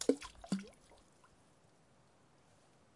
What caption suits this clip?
Tossing rocks into a high mountain lake.
water, splash, splashing, percussion, bloop